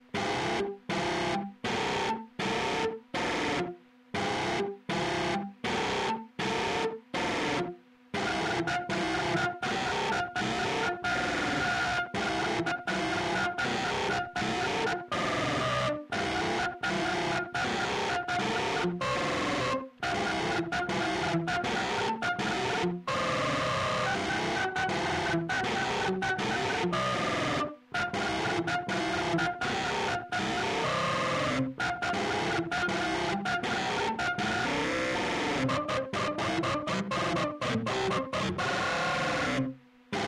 Dark robotic sample 026
Sample taken from Volca FM->Guitar Amp.
artificial, dark, fm, robotic, sample, volca